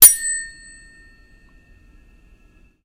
Very close recording of metal spoons. Audio was trimmed and amplified to create a sample.